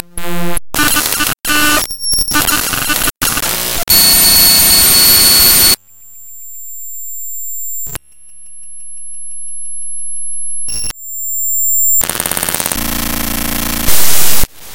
lobby blend
digital glitch noise